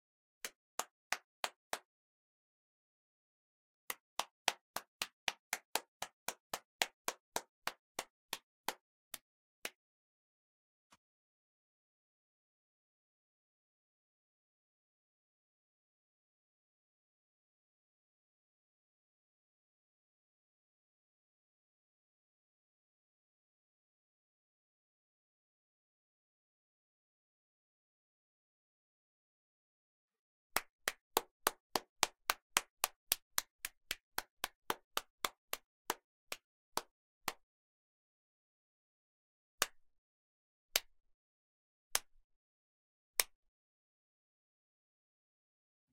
Clapping done by a single person, with the intention of merging all the pieces together and having a full applause.
Recording by Víctor González
applause, clap, clapping, hands, indoors, single